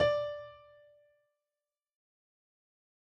d octave 6
notes, octave6, piano